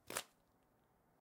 Closing a Paper Fan
Paper, Fan